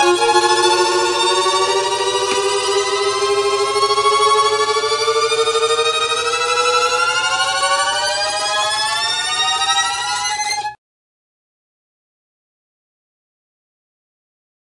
Portamento Sample 5
I recorded tremolo violin parts, one on top of the other, threw in some reverb, and viola!